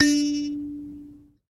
SanzAnais 62 D3 bzz
a sanza (or kalimba) multisampled with tiny metallic pieces that produce buzzs